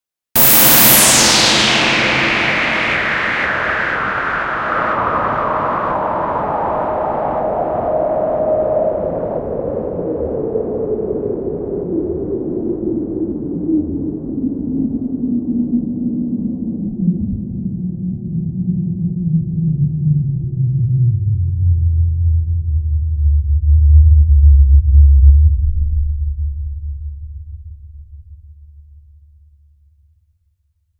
ELECTRONIC-NOISE-filtered-glitch-wall-of-sound04
While outputting a file of sound effects, I ended up with a severely corrupted file. Playback results include incessant shrieking and slight pitch alterations. To create more variety, I used a lowpass filter and long reverb.
electro, brown-noise, pink-noise, filter, digital, glitch, noise, lo-fi, experimental, saturation, sweep, processed, overdrive, electronic, overdriven, white-noise, reverb, block, saturated, distortion